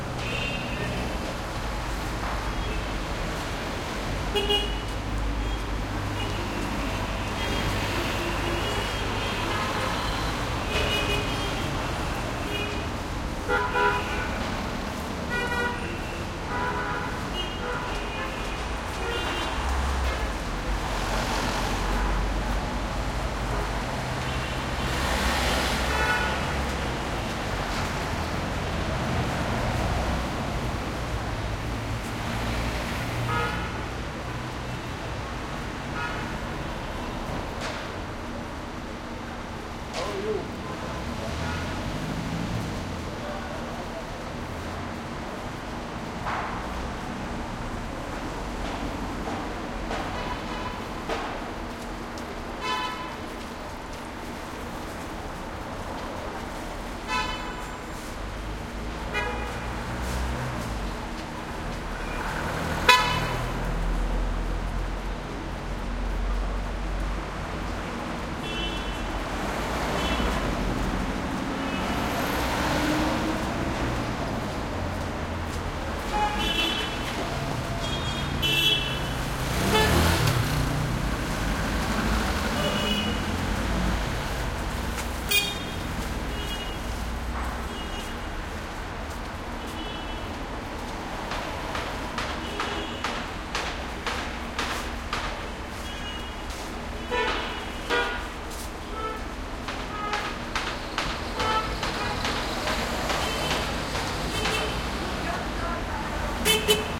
traffic medium Middle East busy boulevard horn honks2 echo like tunnel and construction hammering Gaza Strip 2016
boulevard, busy, city, East, honks, horn, medium, Middle, street, traffic